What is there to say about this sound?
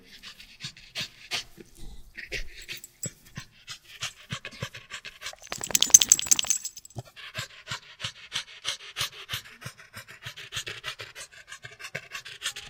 Old hound dog panting - then shakes off
This is an old hound dog wiggling on his back on the ground panting heavily. He does that dog shake thing mid way.
breathing, dog, panting, hound